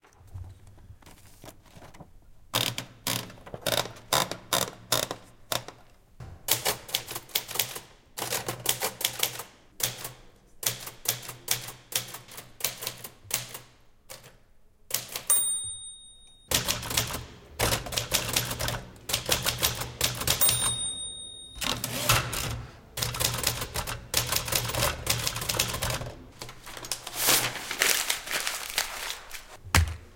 OLD Typewriter ALL
Old Typewriter: Scroll in the paper, typing, end line bell, Scroll out the paper
typing, Typewriter, Old